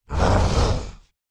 A large monster voice